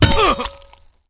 Spade hacking sound, with gore effects
This audio was originally created for a WW2 game, but I thought I'd post it here as well. A watermelon was used to create the gore effect.